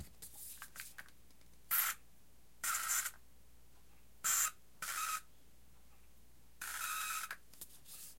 Weird Spray Can
bizarre electric-like sound of a spray can
recorded with a zoom mic
bottle, can, spray